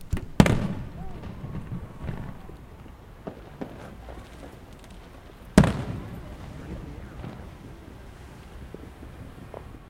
Fireworks recorded with laptop and USB microphone as I leave the car and head for a dead spot I should have avoided, recording loud sounds without a limiter is not easy.